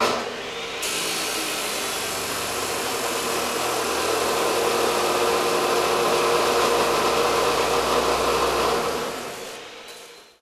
mono field recording made using a homemade mic
in a machine shop, sounds like filename--drill press on and off--nice drone
percussion, field-recording, metallic, machine